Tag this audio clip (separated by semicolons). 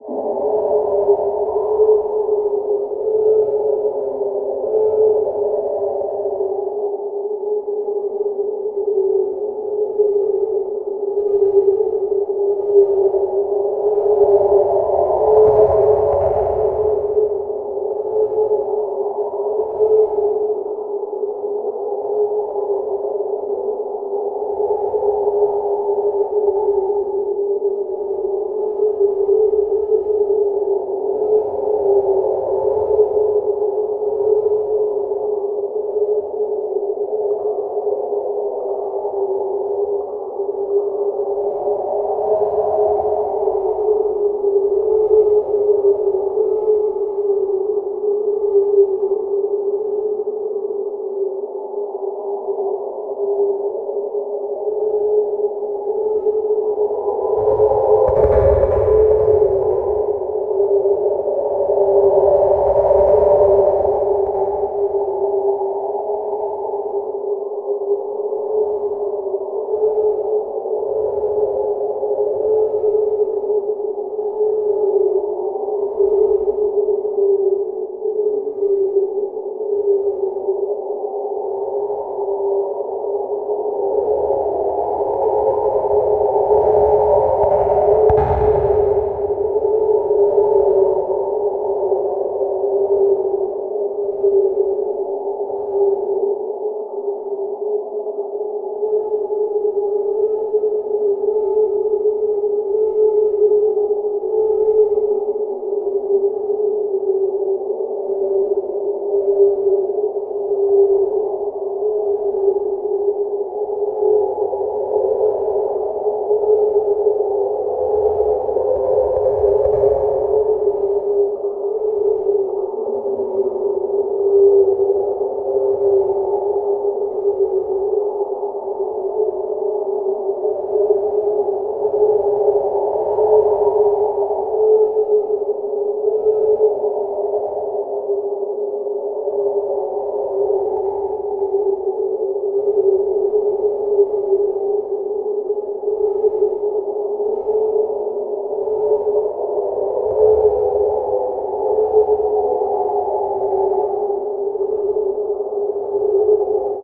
adventure; curse; Cursed; fairy; forest; game; game-sound; Ghost; gothic; magic; magical; magician; magnetic; priest; rpg; spark; sparks; spell; video-game; volt; wind; witch; wizard; wood; zapping